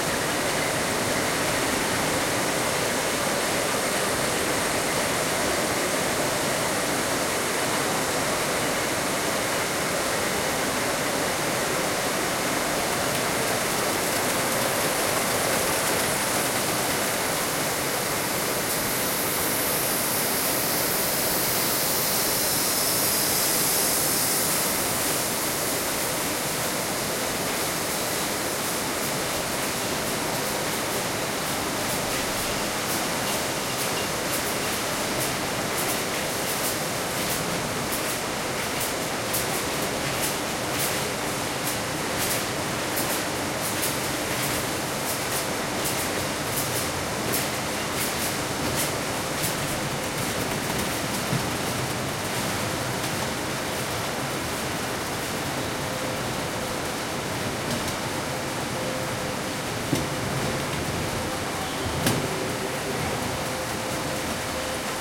Industrial ambiance
Ambiant sound recorded on october 2015 in the visit of a warehouse/factory in Roubaix France with a Zoom H1. Machinery noises, conveyor belt, various clatter and so. May contain some voices.
mechanical, industrial, warehouse, machinery, factory, machine